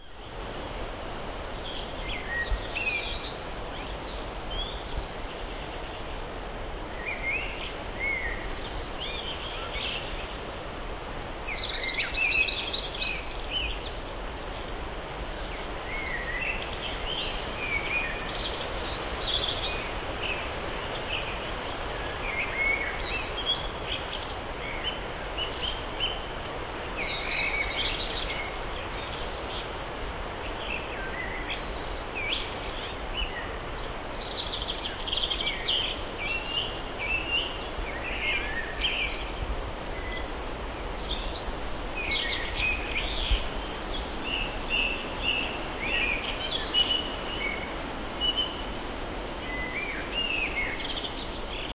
Forest Wind
I just went outside with a microphone and recorded the "Swedish Spring"
Nature, Birds, Trees, Forest